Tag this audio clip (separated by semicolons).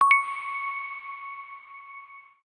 coin; note; object; item; diamond; pick-up; game